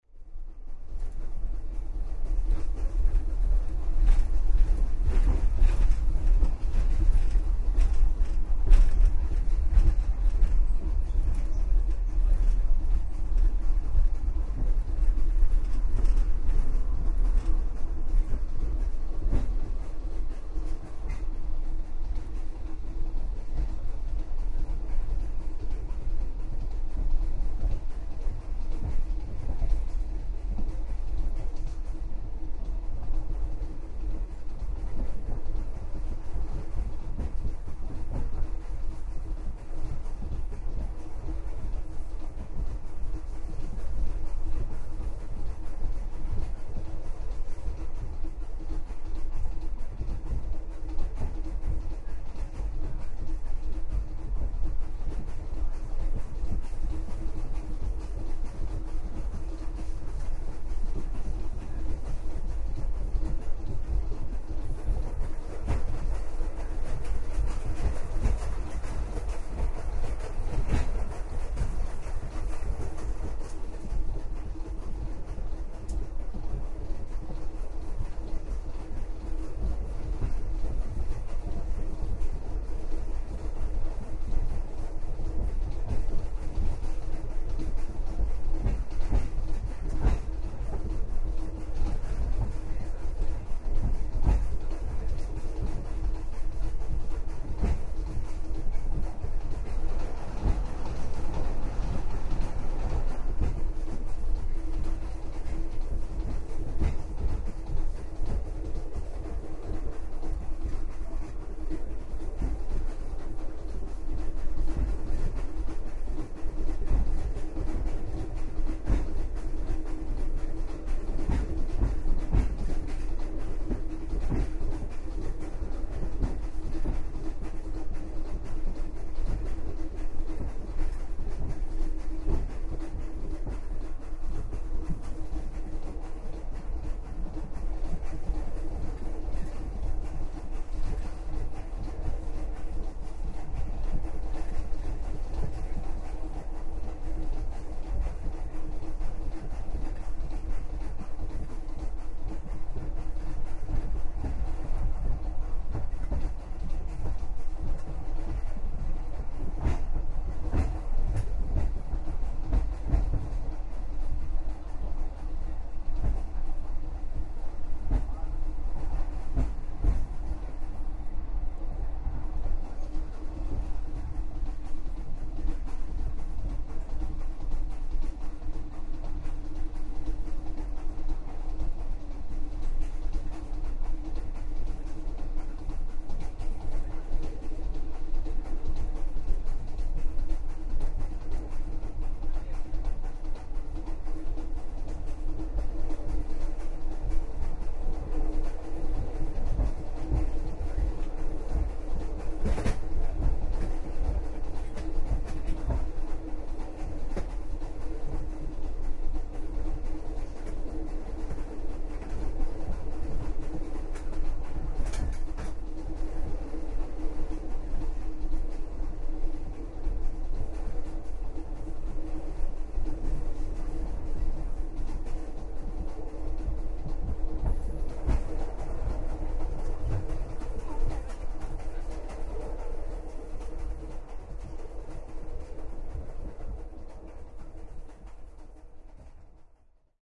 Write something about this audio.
Bangkok Chiang Mai3
On the overnight train from Bangkok to Chiang Mai
chiang-mai, bangkok, train